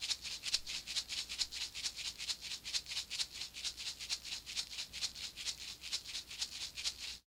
Tape Shaker 10
Lo-fi tape samples at your disposal.
Jordan-Mills, collab-2, lo-fi, lofi, mojomills, shaker, tape, vintage